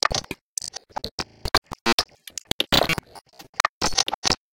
clicks and pops 7
A strange glitch "beat" with lots of clicks and pops and buzzes and bleeps. Created by taking some clicks and pops from the recording of the baby sample pack I posted, sequencing them in Reason, exporting the loop into Argeïphontes Lyre and recording the output of that live using Wire Tap. I then cut out the unusable parts with Spark XL and this is part of the remainder.
beat, beats, click, clicks, glitch, glitches, granular, idm, noise, pop, pops